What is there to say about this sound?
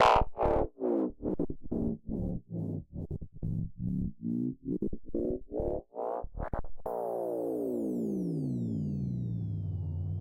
An Fx usable in trance music - or whatever your imagination can create.
Dance, Processed, Fx, Psytrance, Trance